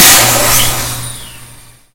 magicProjectile impact
Magic projectile exploding against it's target.
Dubbed and edited by me.
blast, energy, explosion, impact, magic, projectile